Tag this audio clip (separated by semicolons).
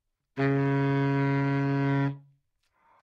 good-sounds; baritone; multisample; neumann-U87; Csharp3; sax; single-note